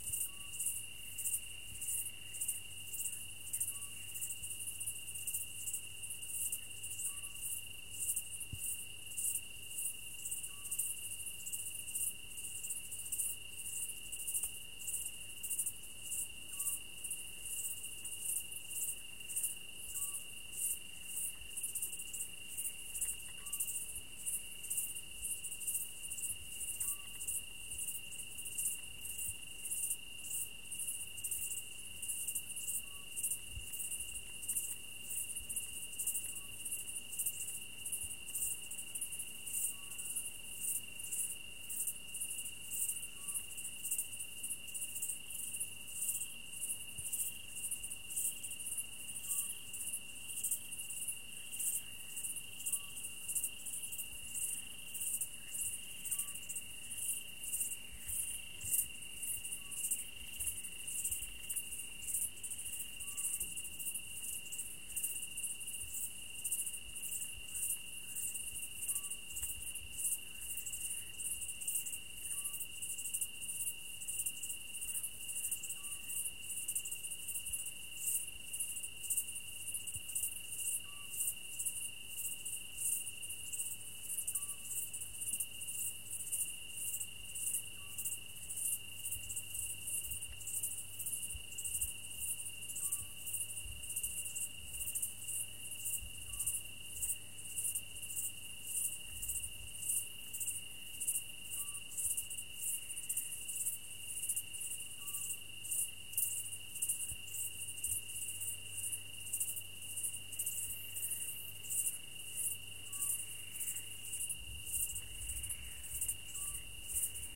Ambience countryside night 01
Ambience, countryside, nature, night